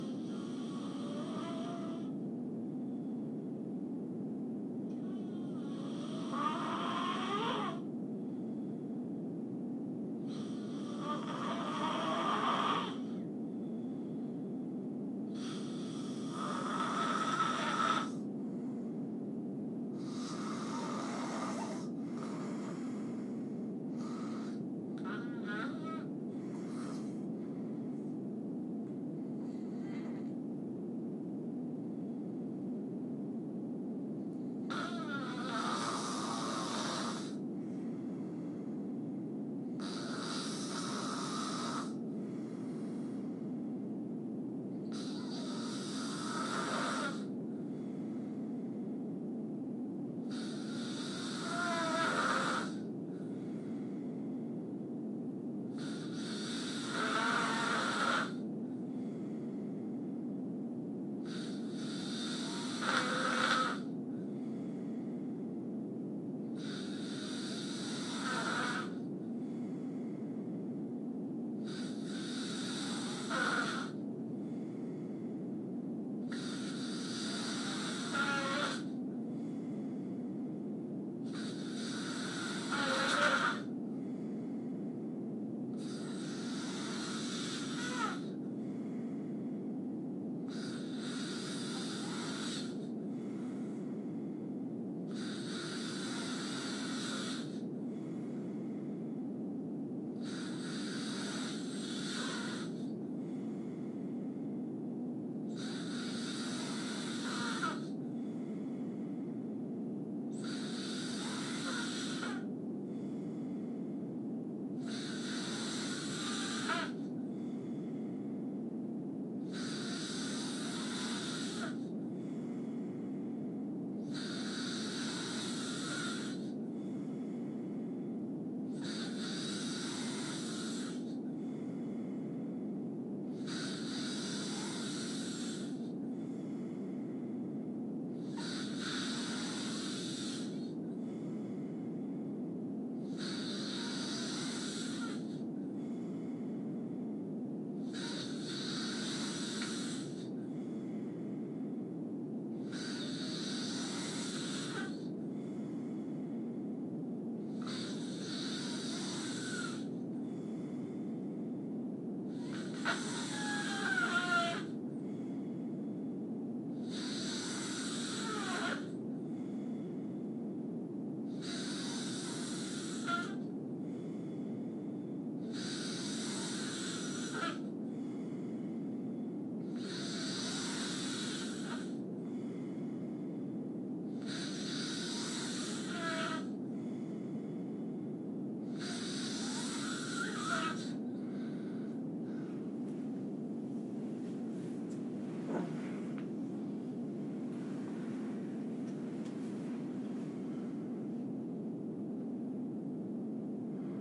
FX - ronquidos